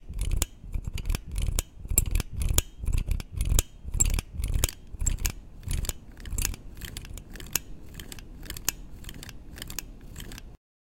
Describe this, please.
scoop 2 parts, cross-faded trimmed, faded in-out
I used two manipulated recordings of the ice cream scoop, and cross faded one into the other. I don't think it came out very well, but then again, I made it based on manipulation technique and not composition desire, so I guess it's okay.
manipulated, ice-cream, squeeze, scoop, mechanical, MTC500-M002-s14, gear, noise